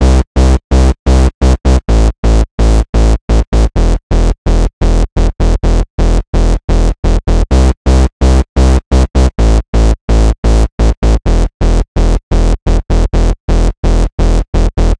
Power Bass Electro House Synth (SAW+SQUARE)
Electro bass hook progression. Use this as a basis for an electro house track. Add a kick, snare, loop, house leads, fx, whatever you want.
saw, dance, progression, bpm, square, electronic, progressive, synth, house, bass, movement, 128, electro